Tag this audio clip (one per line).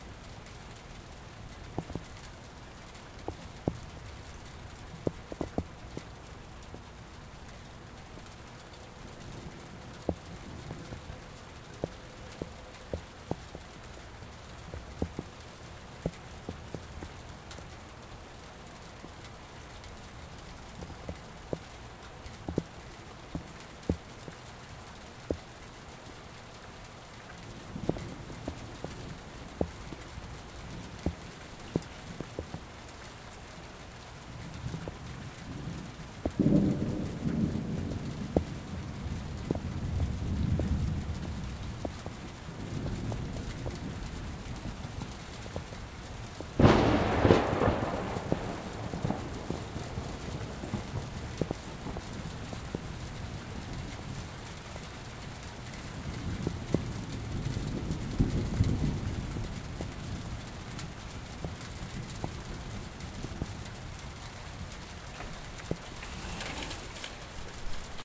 thunder
rain
field-recording